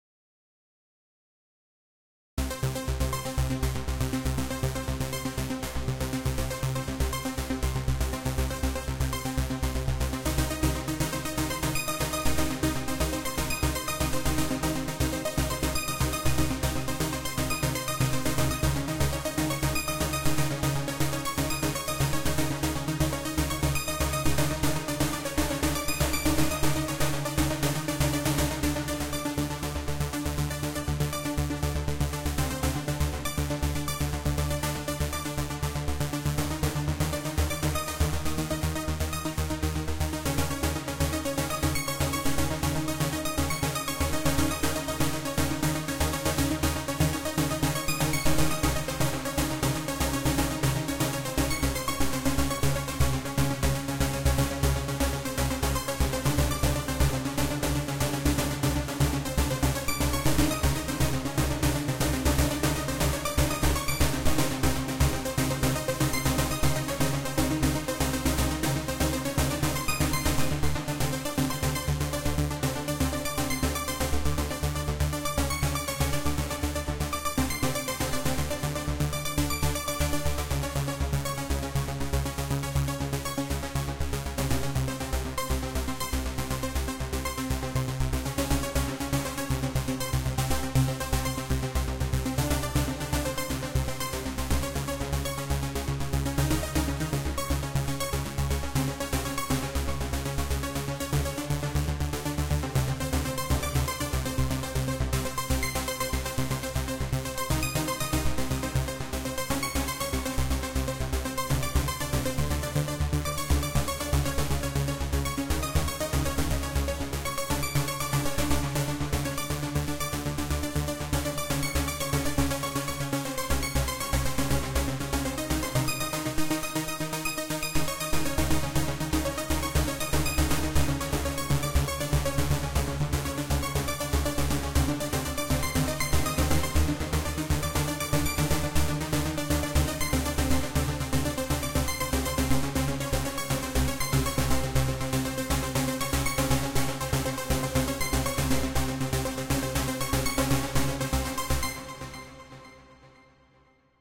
Music was created for the scifi genre, mainly cyberpunk or similar sounds to the movie TRON.
cyberpunk, futuristic, scifi